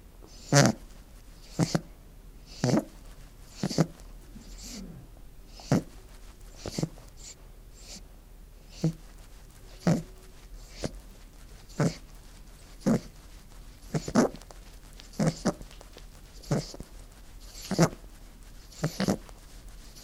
bunny lick feet
Bunny unattended licks his front feet and makes and sticky, wheezy sound
gurgle, snuffles, wheeze, rabbit, purr